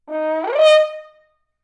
A "rip" is a quick glissando with a short, accented top note. Used in loud music or orchestral crescendos as an accented effect. Recorded with a Zoom h4n placed about a metre behind the bell.